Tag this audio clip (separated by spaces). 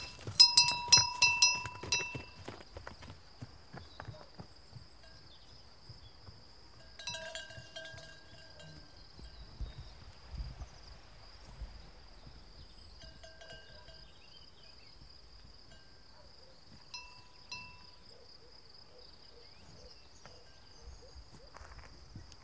campana vaca bell cow vacas ring campanilla bells